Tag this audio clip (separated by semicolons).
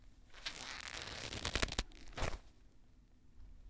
vine; stretch